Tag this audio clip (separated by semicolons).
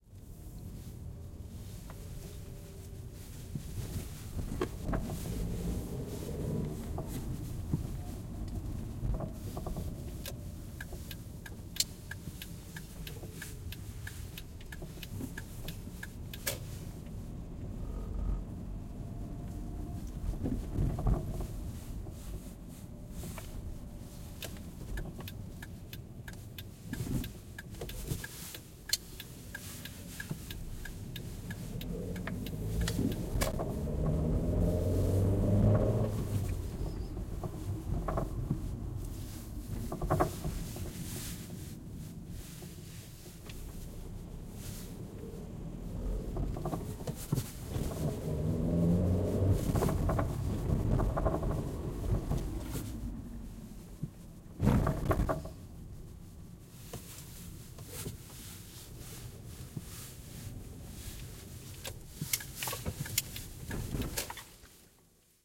CZ; Czech; Panska; car; ride